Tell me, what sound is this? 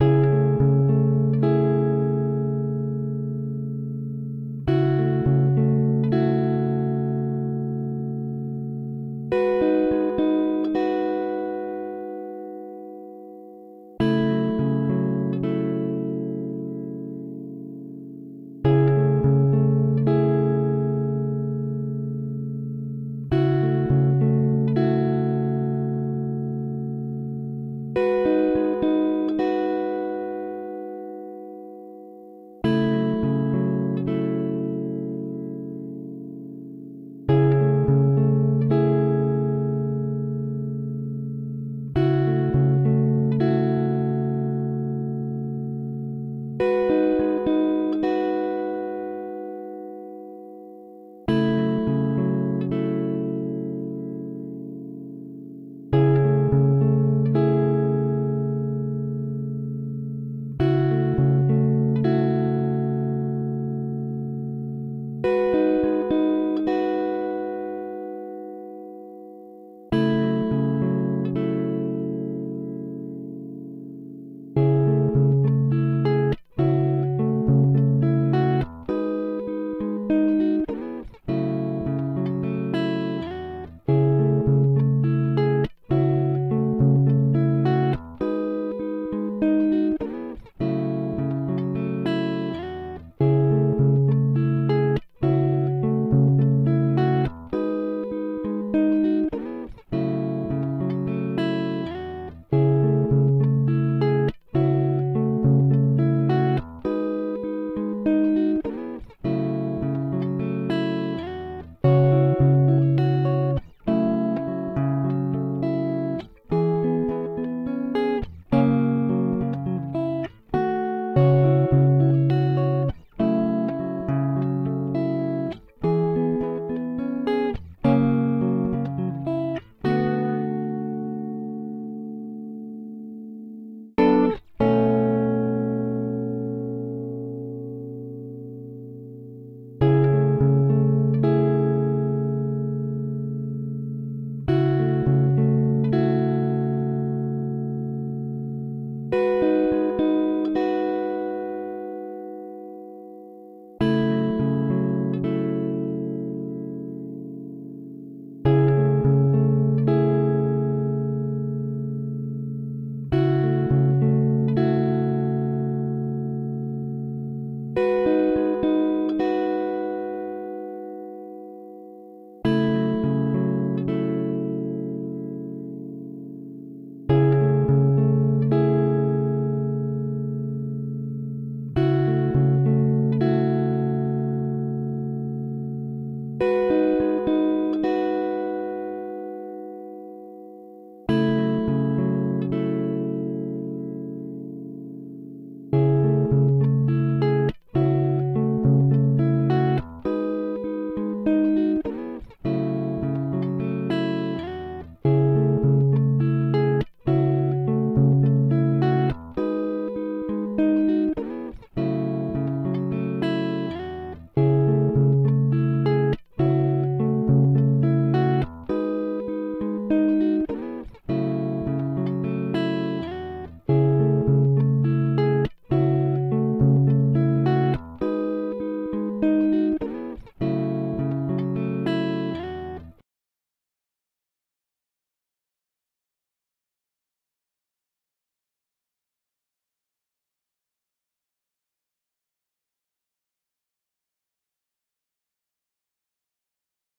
frozen fjords only guitar
Soundtrack guitar stems from frozen fjords song. This is dry guitar recording (with no FX)
THANK YOU!
Paypall:
guitars, gloom, agony, rock, tragedy, regret, lost, sadness, fall, indie, soundtrack, melancholy, despair, depression, movie, snow, drama, guitar, piano, grief, fjords, mellow, lost-love, desolation, slow